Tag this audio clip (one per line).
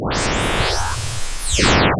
Firefox
Picture